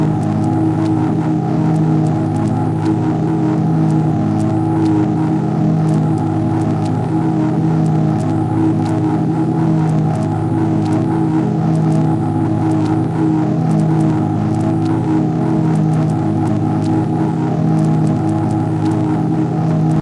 A droning sound made from a Rhodes into a looper pedal into a granular plugin I made. It includes loop points to loop properly.
ambient, delay, granular, loop, noise, weird